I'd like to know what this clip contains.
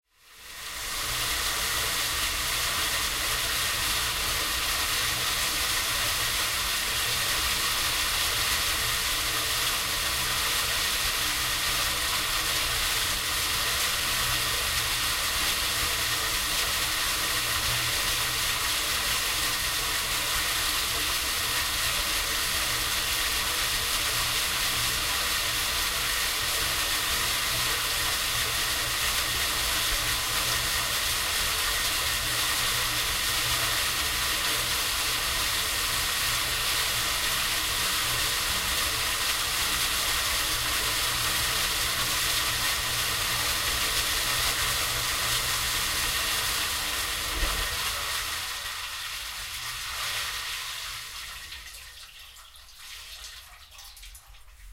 Some kind of electric water pump.